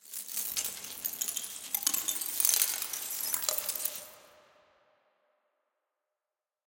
Dropped, crushed egg shells. Processed with a little reverb and delay. Very low levels!